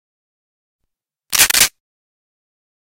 Racking the action of an antique pump shotgun. It may have been a Winchester knock-off. May require some trimming and buffing. I think I recorded this with an AKG Perception 200 using Cool Edit -- and I did it to get my room-mate to leave (after we recorded the sounds of several of his guns and a sword .
pump, racking, shotgun, action, ancient